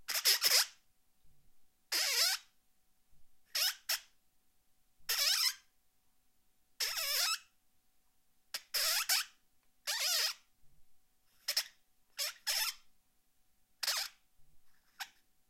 Uncut takes of multiple squeaks from my microphone protective tube. There's some different sounding squeaks in this lot which I didn't edit down into shorter files; not quite what I needed at the time.
Recorded with NTG-3, SQN 4s, Zoom H4.